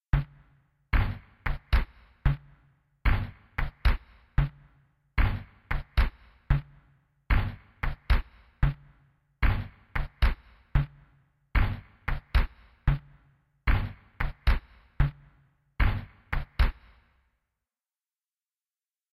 self made drum loop 1
drum-loop, drums, beat, loop, rhythm